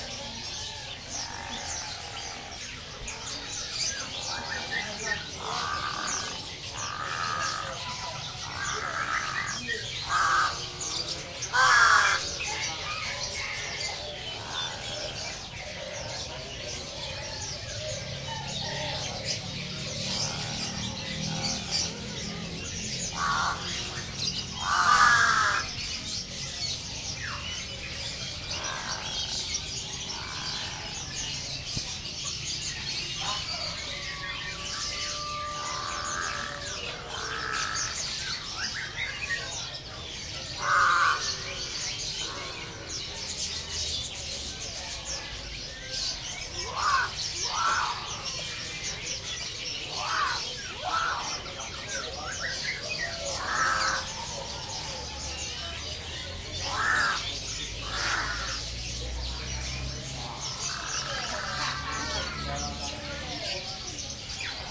bird market in jogjakarta
This is the atmosphere of the recording bird market in Yogyakarta, Indonesia
Recorded in June 2013. Enjoy ..
I use Zoom H4N
nature, ambient, market, indonesia, birds, field-recording, yogyakarta, bird